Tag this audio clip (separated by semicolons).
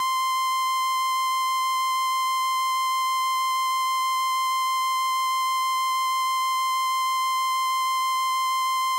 analog; 70s; combo-organ; vintage; string-emulation; raw; transistor-organ; analogue; electronic-organ; sample; strings; electric-organ; vibrato